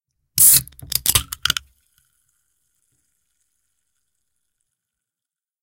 Coca Cola Soda Can Opening
Took a break during a VA recording session. Had a can of coke. Thought "why not".
Coca, Soda, Can, fizz, Opening